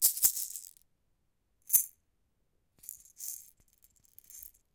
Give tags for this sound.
egg maraca maracas shaking

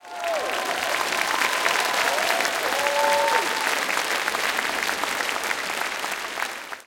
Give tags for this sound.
hand-clapping applause

